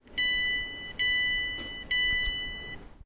Train Door Beep, A
Raw audio of the beeping that occurs on British Southwest commuter trains to let passengers know they can open the doors. This recording is of the interior beep from the within the train.
An example of how you might credit is by putting this in the description/credits:
The sound was recorded using a "H1 Zoom V2 recorder" on 26th May 2016.